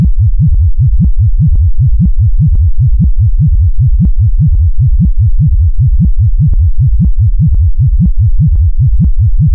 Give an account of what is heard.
03 FM Fwub Bass
Bass sound using FM synthesis which ramps the modulation amplitude between 20 and 250 over 1 second. Sounds a bit like a slowed down sample of water bubbling